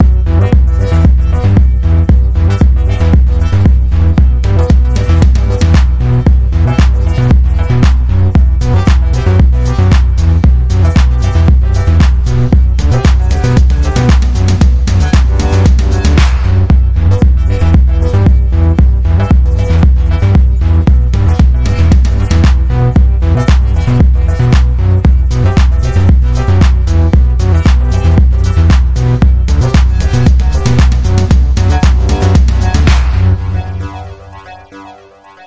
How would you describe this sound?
Tibudo Loro
dark, E